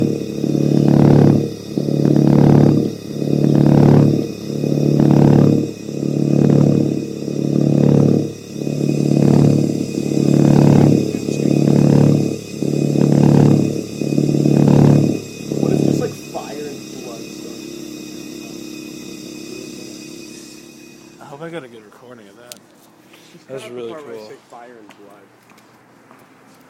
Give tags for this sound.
creepy; drone; field-recording; space

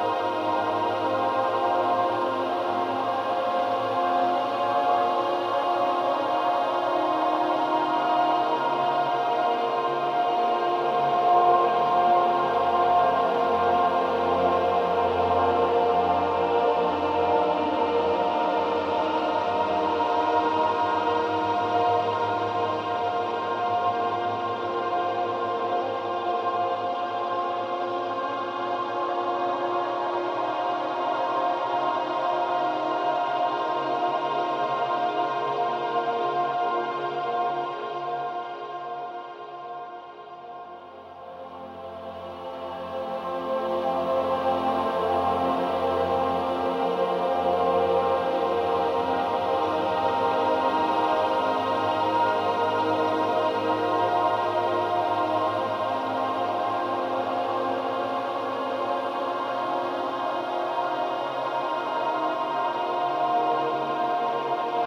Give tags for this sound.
ambient-software; choir; granular

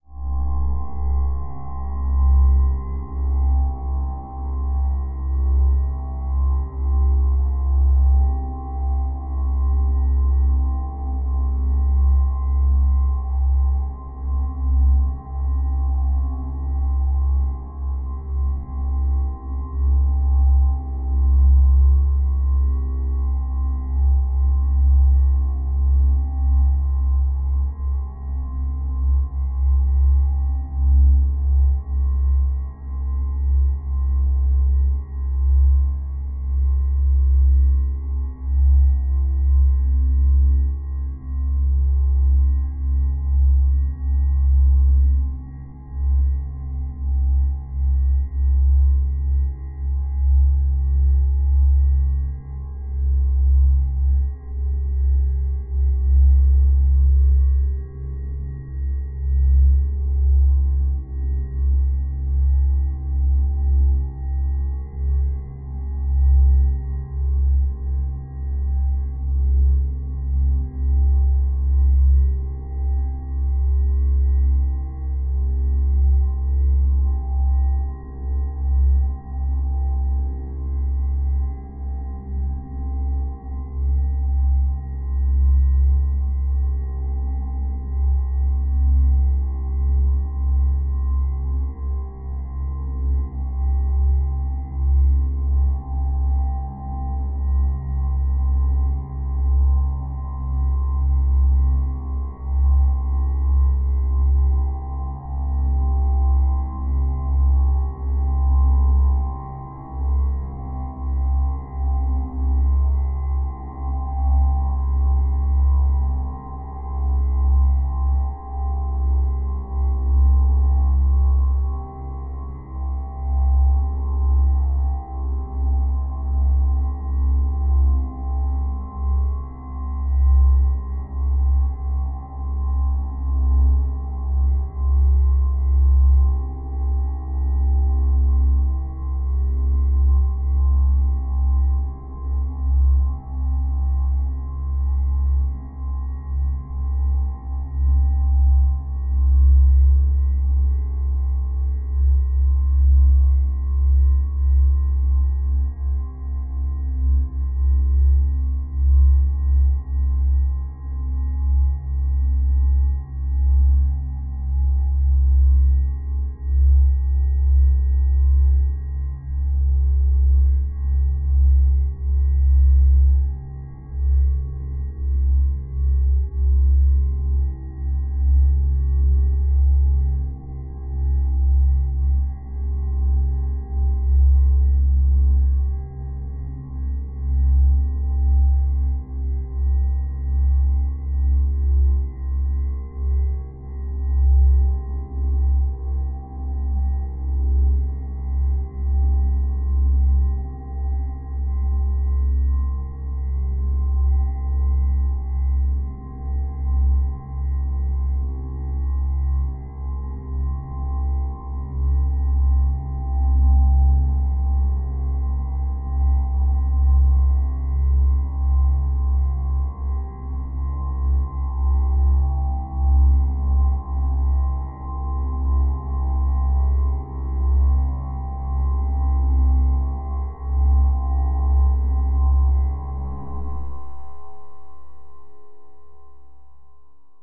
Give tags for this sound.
Ambient; ElectronicSynthAmbientElectronic; Drone

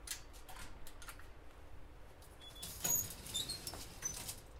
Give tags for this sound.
door; elevator; library; field-recording; open

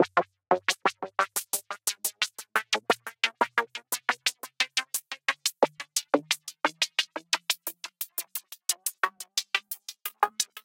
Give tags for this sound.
electronic; sequence; synth

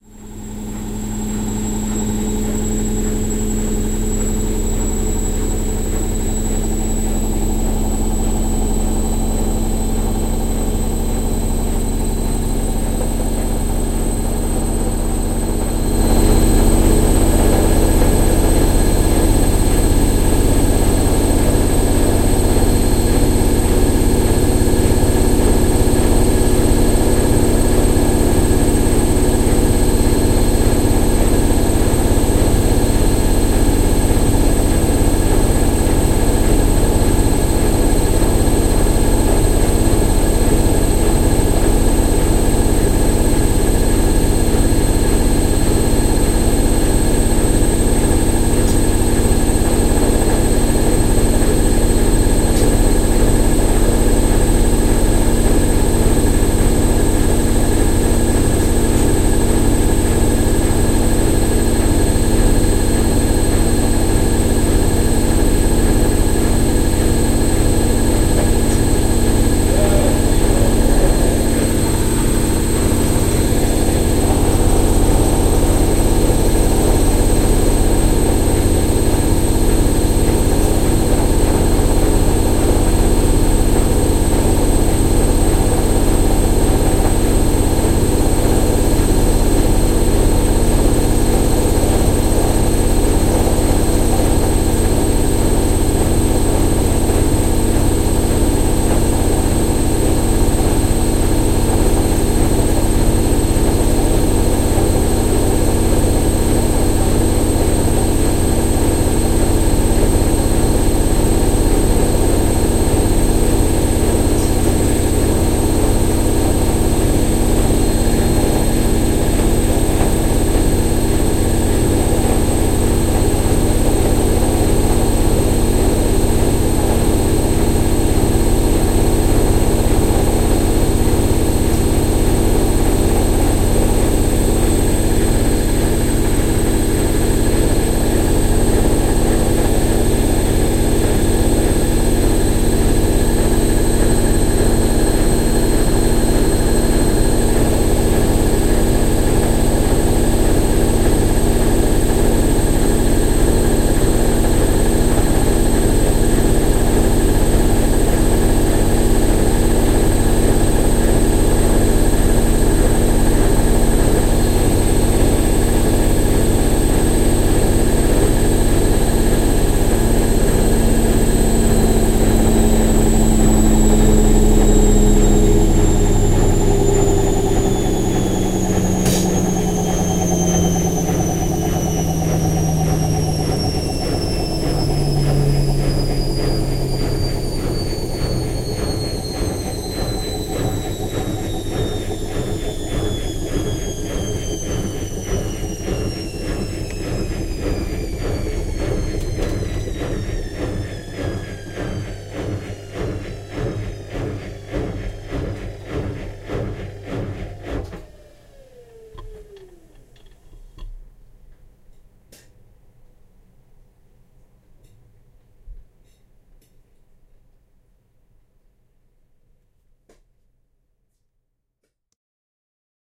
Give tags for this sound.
washing; whirl; recording; harsh; noise; machine; mechanic; washer

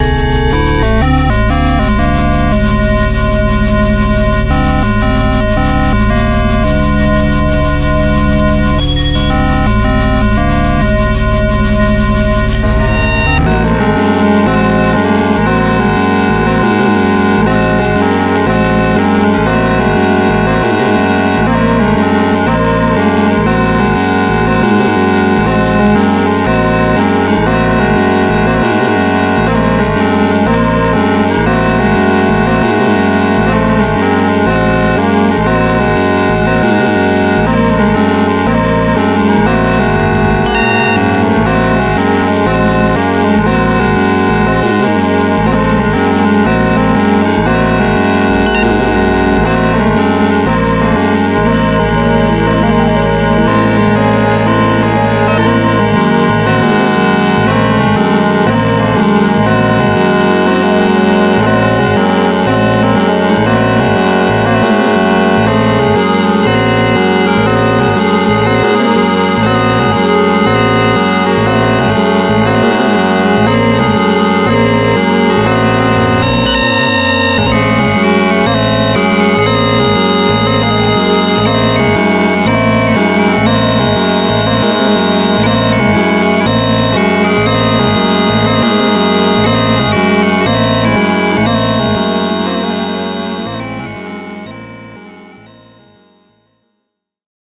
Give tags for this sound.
circuit; yamaha-psr-12; bent; circuitbent

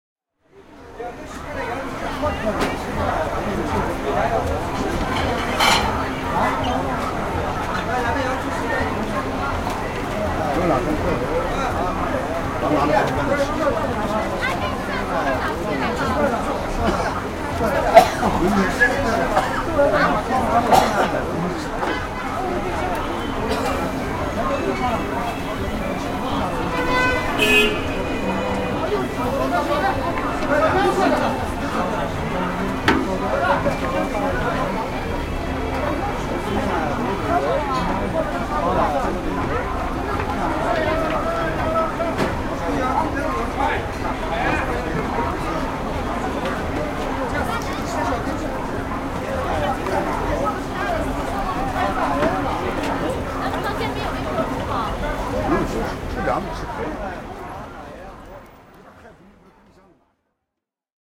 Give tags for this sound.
cars; china; chinese; field-recording; horns; music; night; pedestrian; qinghai; restaurant; sell; sellers; street; street-food; voices; xining

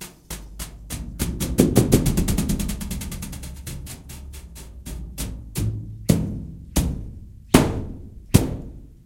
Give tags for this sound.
scrapes; hits; taps; brush; random; objects; thumps; variable

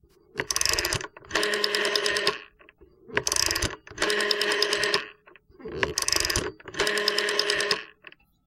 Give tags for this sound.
Dialing; PTT; T65; telephone

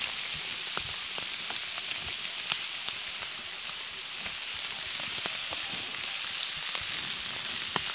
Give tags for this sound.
chef; pan

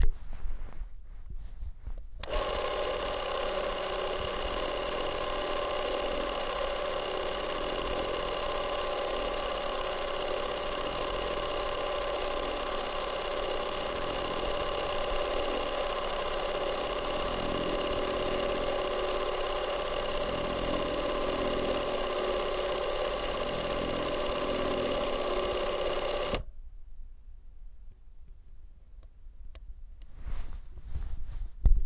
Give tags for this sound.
8; 8mm; camera; film; film-camera; shutter; super

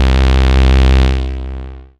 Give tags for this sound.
basic-waveform; multisample; reaktor

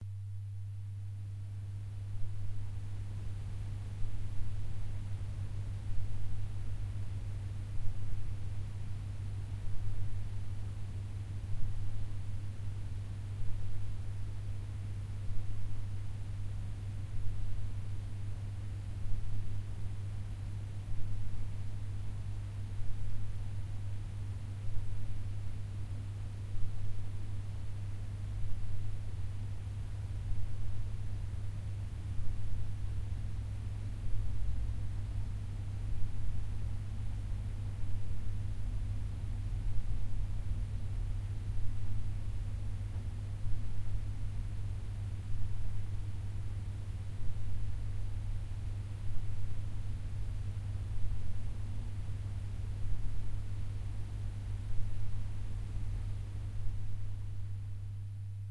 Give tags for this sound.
Ambience; hum; Quiet; Atmosphere; Room

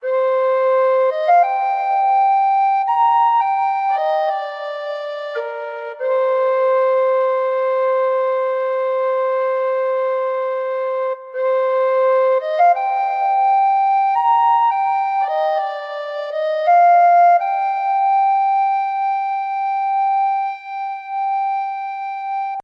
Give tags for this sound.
folk; tune; recorder; whistle; irish; ireland; melody; tin; flute; celtic

